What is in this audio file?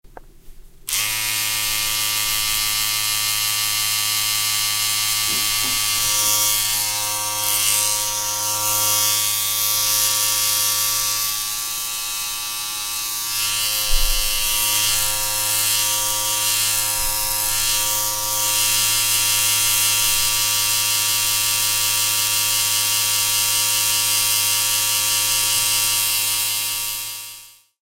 maszyna do golenia shaving
electric mechanical shaving device at work
mechanical, shave, shaving, buzz, electric-razor, morning, electric, machine